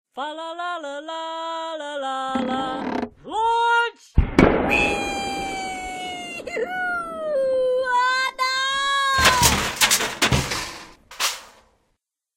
Fa-La-La-La-Launcher - Elves at Play
Two of Santa's elves are playing with a catapult called the "Fa-La-La-La-Launcher." They are now launching each other.
This composite sound was developed for a 252Basics Family Production for December 2013 (Week 4/D, Bottom Line "I can have joy because God's story is for everyone.") If you came here looking for sounds in your skit, this goes in the WrapUp/Landing. Everyone else, use it if you wish. There's nothing in here that says "God" and is just a couple of elves having way too much fun with a catapult. It will be our little secret.
Listing my work in your credits is sufficient. Other sounds used:
elves, cans, crash, rocket, flying, catapult, trebuchet, Christmas, 252basics, launch